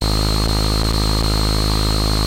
nordy glitch 007
A buzz and hiss with a very slight rhythmic pattern.